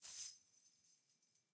chains 12swipe
Chain SFX recorded on AT4033a microphone.